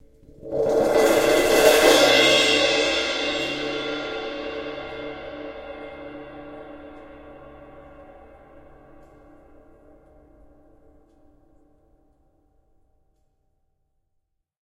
Cymbal Swish Short
A cymbal swell or roll with faster attack. Medium-sized zildjian cymbal (exact type unknown).
attack, cymbal, roll, short, swell